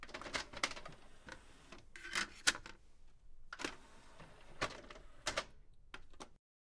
putting CD into player
into, cd, putting, player